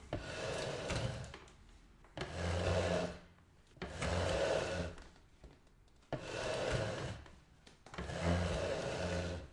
The dragging of a bookcase.
Drag, Object